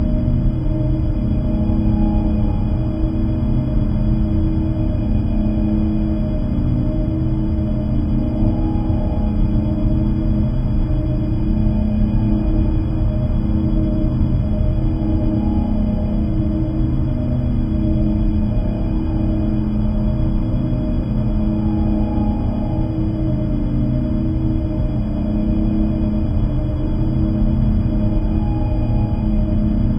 S L 2 Scifi Room Ambience 05
Ambience for a scifi area, like the interior of a space vessel.
This is a stereo seamless loop.
Ambience, Indoors, Room, Scifi, Tone, Turbine